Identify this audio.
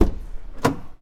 sons cotxe manilla 3 2011-10-19
car, sound, field-recording